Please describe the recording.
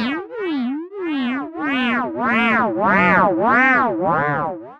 synthesized laugh
Soul Digger 🎼🎶
cartoon, funny